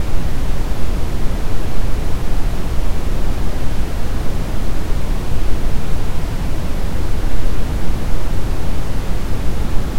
Brown Noise 10 seconds
Brown, Noise, Radio